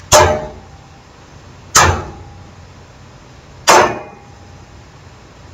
Simple effect of bullet that hits the car :) I just made it because I could not find it here.